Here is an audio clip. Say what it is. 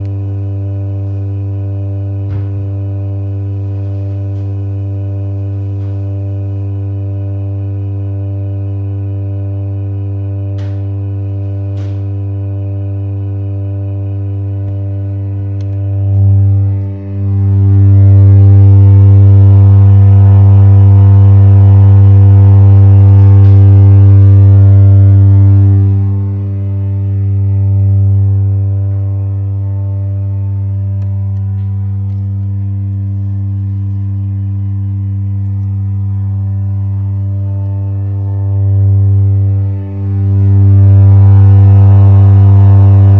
Recording of random organ notes being played. Recorded on Zoom H2.
organ, random, sounds